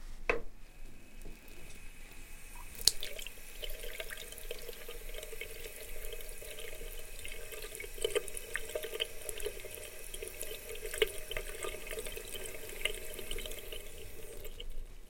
Water sink 5
Water pouring into water sink.
zlew, water, azienka, toiler, umywalka, sink